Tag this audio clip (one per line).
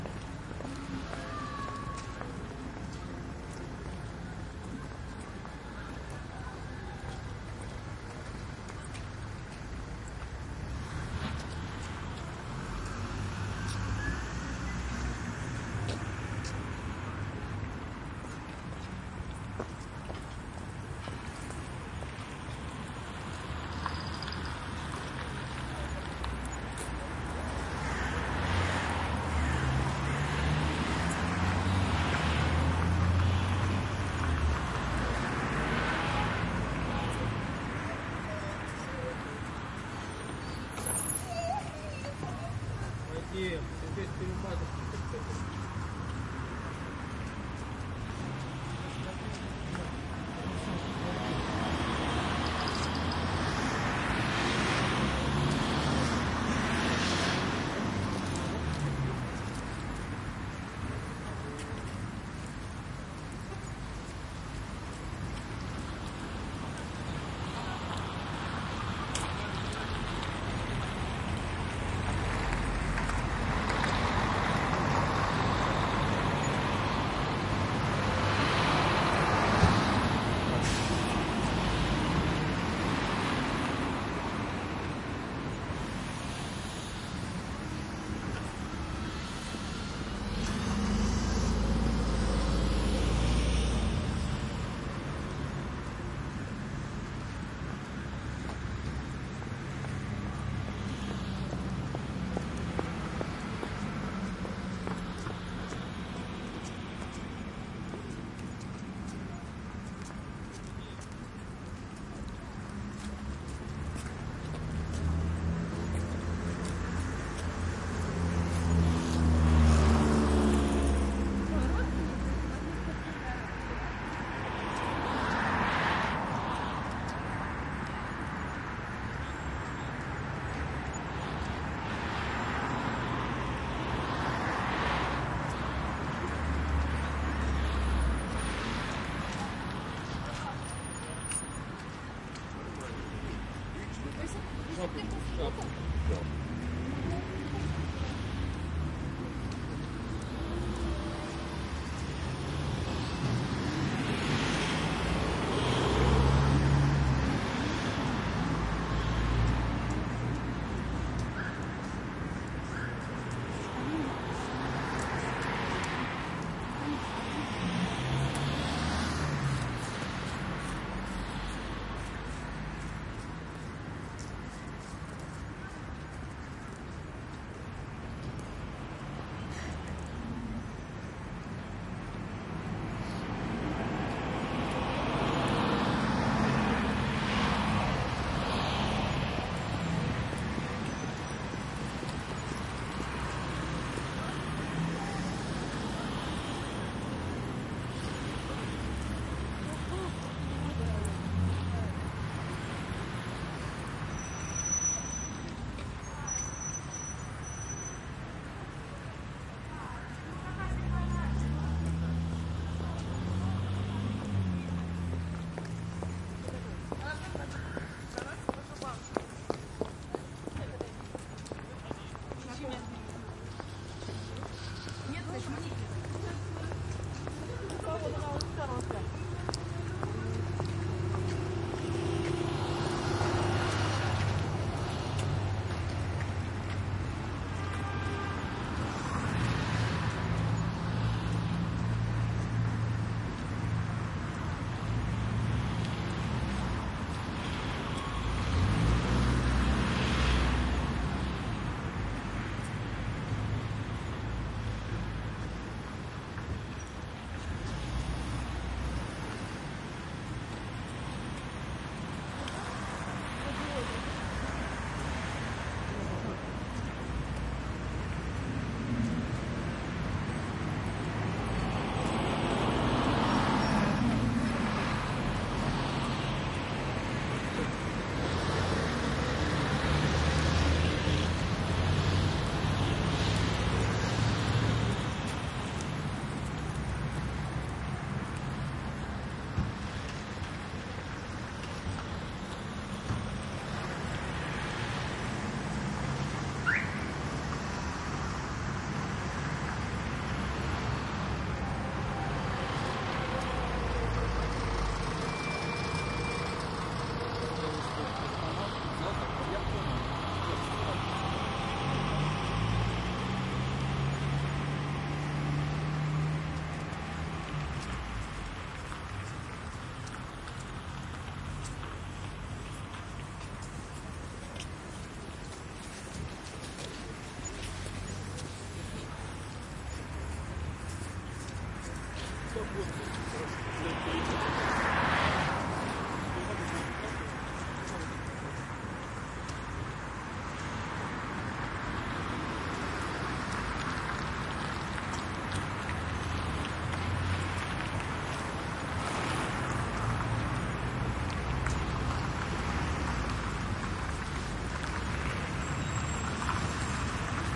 moscow
field-recording
binaural
crowd
traffic
russia
footsteps
street